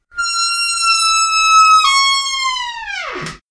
Squeaky Door
My bathroom door is horribly squeaky
Door, Squeak, Wooden